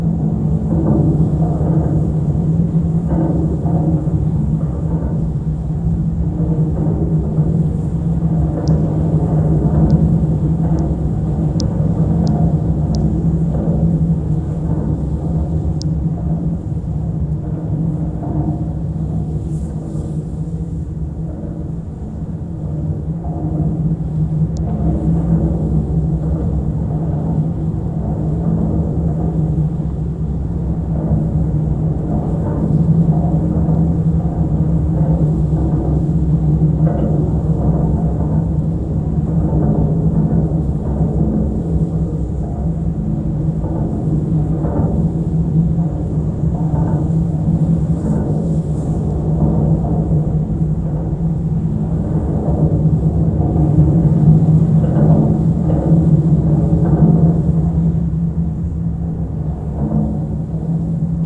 GGB A0219 suspender NE07SW
Contact mic recording of the Golden Gate Bridge in San Francisco, CA, USA at the northeast approach, suspender #7. Recorded October 18, 2009 using a Sony PCM-D50 recorder with Schertler DYN-E-SET wired mic.